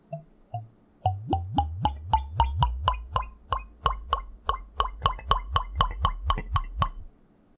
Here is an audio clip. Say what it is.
Glug Glug
Pouring a liqueur into a shot glass.
Recorded on a Blue Yeti Microphone through Audacity at 16bit 44000Hz.
Background noise filtered out.
boing, glug, jar, liquid, pour, pouring, water, whiskey